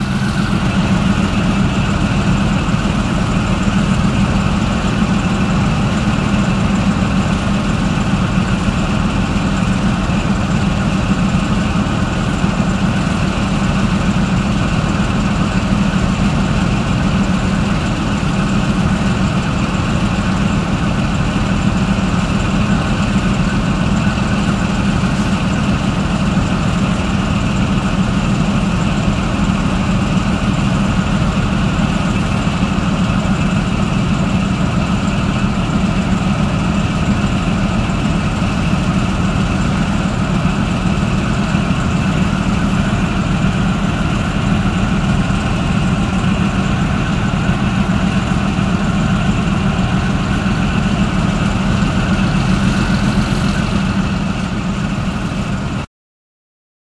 WAR-TANK-ENGINE STAND BY-Heavy diesel engine-0004
Heavy trucks, tanks and other warfare recorded in Tampere, Finland in 2011.
Thanks to Into Hiltunen for recording devices.